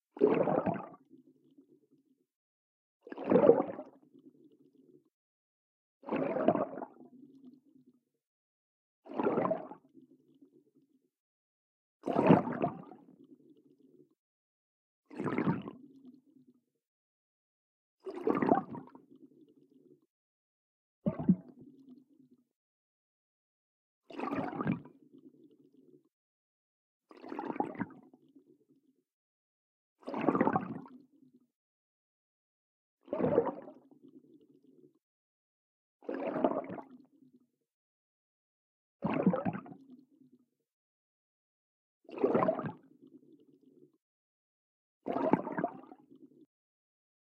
Underwater Movement
These are some hydrophone recordings I made for a game-jam project.
ambience, field-recording, ocean, one-shot, underwater, water